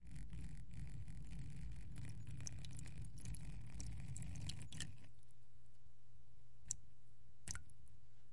Pouring Water 03
Someone pouring water.